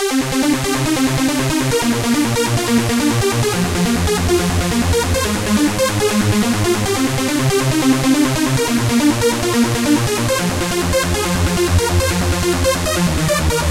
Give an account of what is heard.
The Light 1
sequence, techno